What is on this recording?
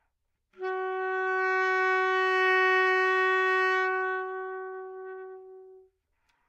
Part of the Good-sounds dataset of monophonic instrumental sounds.
instrument::sax_baritone
note::B
octave::2
midi note::35
good-sounds-id::5372
Intentionally played as an example of bad-dynamics